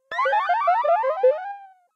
Life Lost Game Over
Electronic descending tones, could be used for a game over or lost a life for a game, or perhaps for a digital signal for another kind of event in a game?
bleep, die, electronic, game, life, lost, over